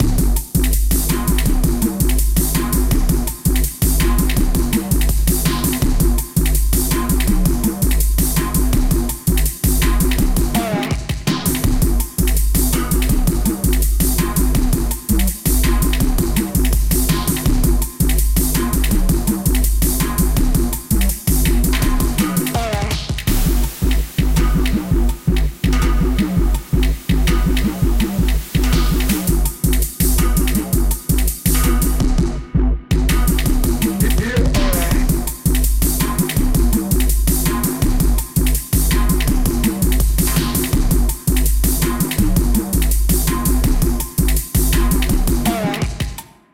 Club Handover
The handover happens in an old warehouse in Vienna.
Operation "Pod zemí" was a success;
none of the partygoers saw the package.
This track uses the following vocal samples:
Although, I'm always interested in hearing new projects using this sample!
bass, beat, club, dance, drum, drum-n-bass, electro, fragment, handover, heavy, industrial, loop, music, techno, underground, warehouse